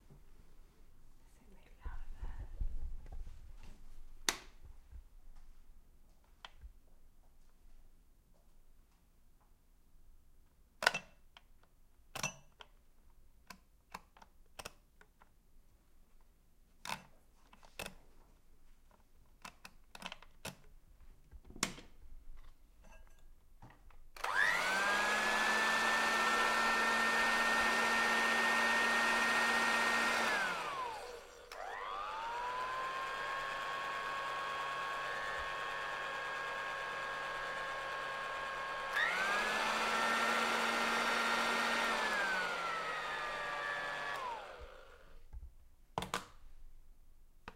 a hair dryer at different speeds